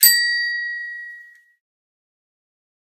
bicycle-bell 06
Just a sample pack of 3-4 different high-pitch bicycle bells being rung.
bell, bells, bicycle, bike, bright, chime, chimes, clang, contact, ding, glock, glockenspiel, high-pitched, hit, metal, metallic, percussion, ping, ring, ringing, strike, ting